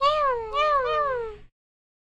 Cute bugs having fun